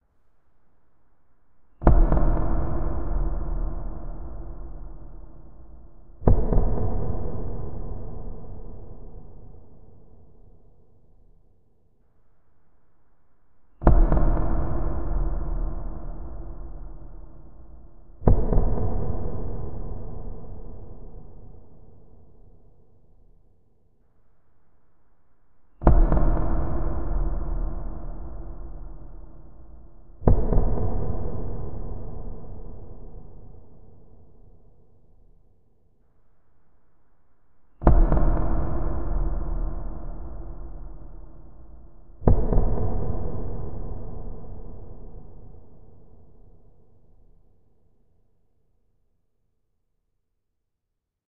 High Tension Two Beats
A percussive sound effect created using a Samson USB Studio microphone, an empty cardboard wrapping paper roll, and Mixcraft 5.
fx, horror, Loop, loud, Percussion, sound-effect